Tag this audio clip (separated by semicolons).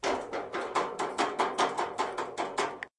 Essen; Germany; January2013; SonicSnaps